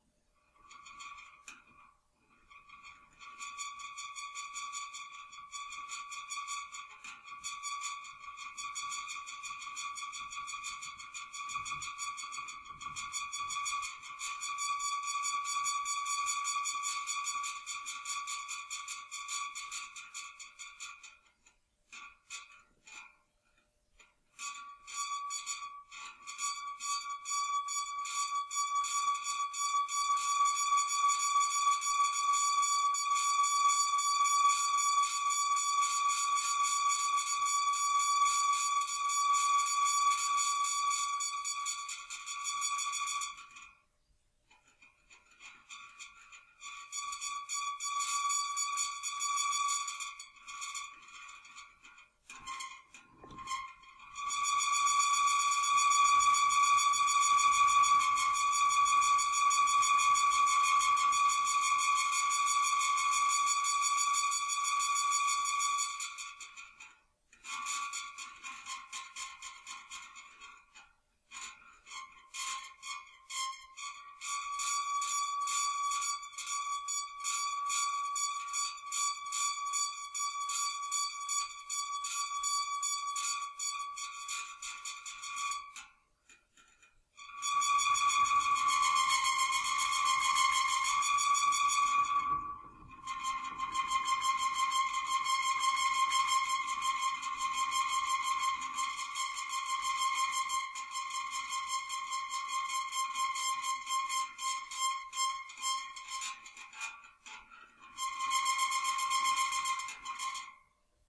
This is a recording of a metal desk creaking with very little movement. I recorded this sound, amplified it, and removed the white noise. That's the only processing this sound has gone through.
I'm sure this sound can be modified in many different ways and put to countless uses.
Be sure to check out the variations of this sounds from the "Creaking Metal Pack"
Recorded with: Shure SM57 Dynamic Microphone.